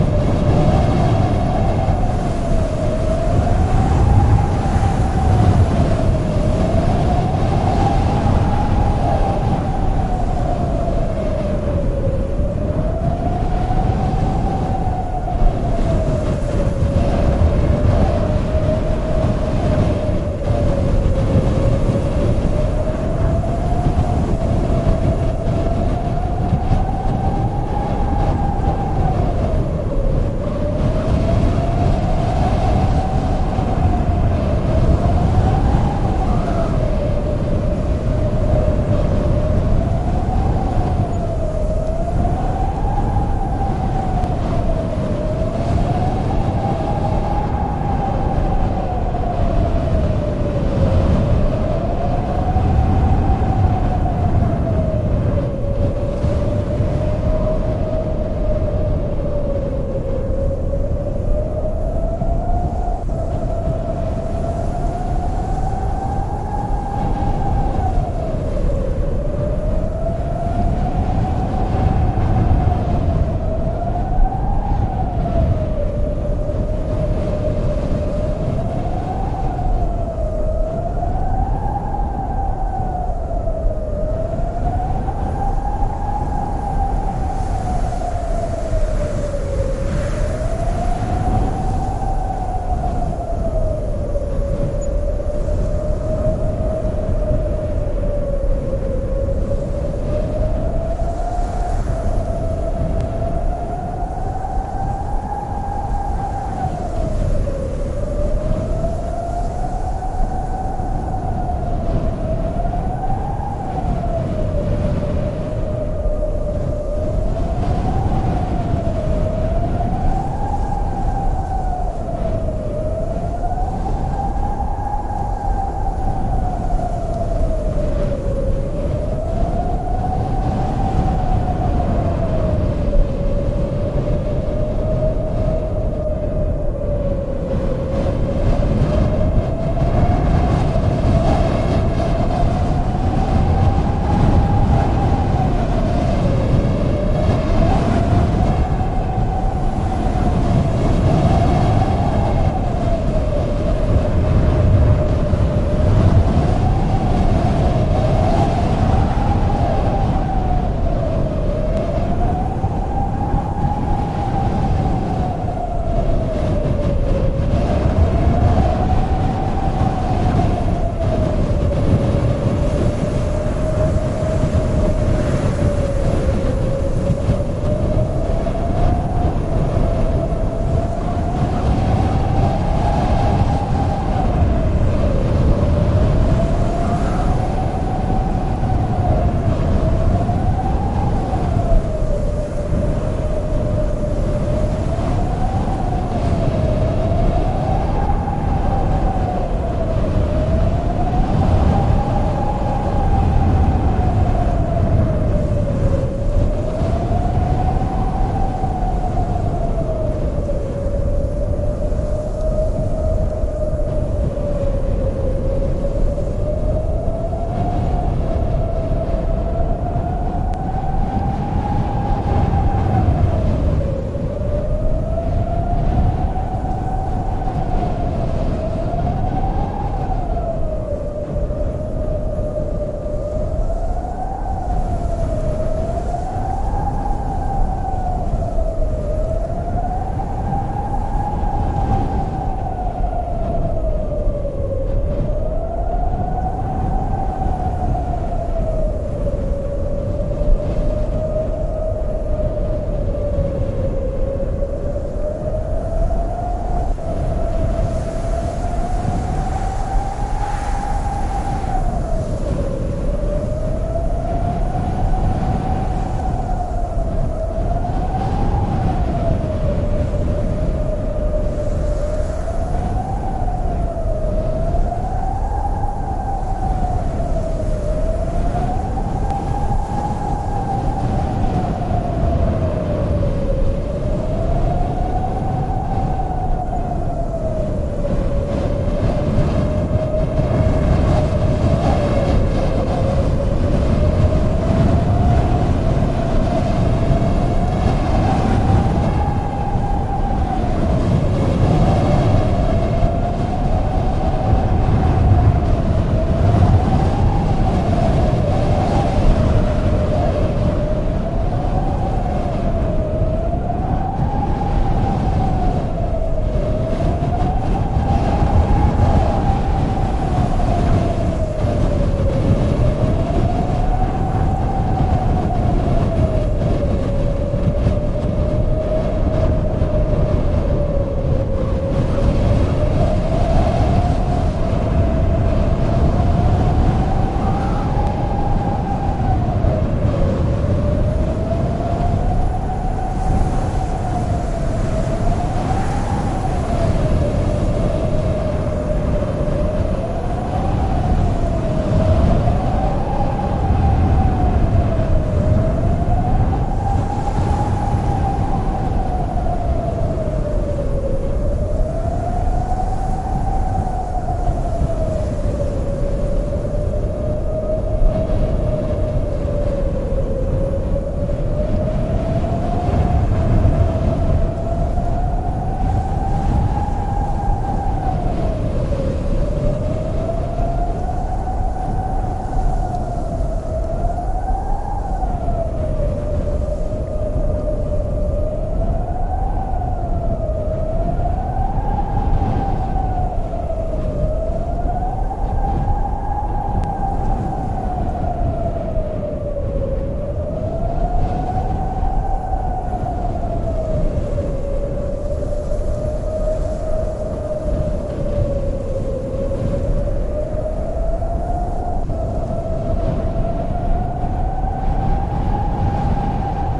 I tried and tried and tried to find a genuine audio recording of a sandstorm, but all I could find were synthetic mixes. What genuine audio I did find always had some other noise or voice polluting the audio. So, I found a couple of great wind audio recordings, some wind rustling a dry corn field, and some low earth rumbling and combined all of that to create the closet approximation I could to the authentic sandstorm audio I was able to find but couldn't use. I hope others find this audio useful in any of your projects.
desert-wind, Sandstorm, storm